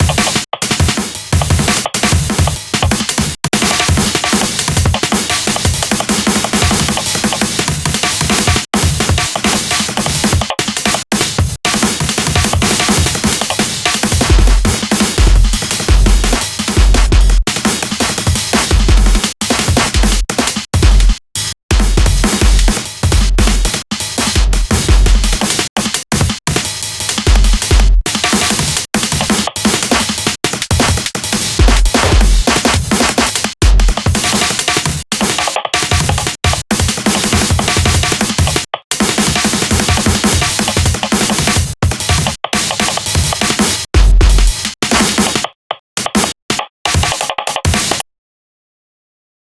Devine Kids Lucille break
Lucille is chillin
in the lounge. She has been untainted and is still a virgin to mega
processing, you can slice and mash these beats as you want too. She is
also a child of the mathematical Equation 3.14 (Pi)
breakbeat, battery, idm, glitch, amen, breakcore